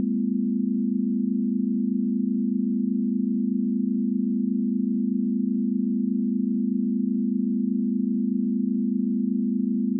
base+0o--4-chord--03--CDEA--100-100-100-30
test signal chord pythagorean ratio
chord, pythagorean, ratio, signal, test